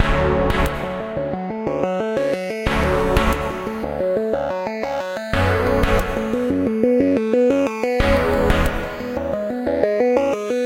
Loop made using Vanguard. cheers :)